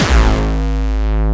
HardcoreKick Seq01 12
A distorted hardcore kick